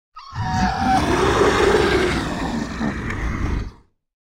A monster roaring.
Source material recorded with either a RØDE Nt-2A or AKG D5S.